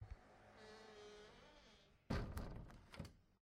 Closing balcony door of the house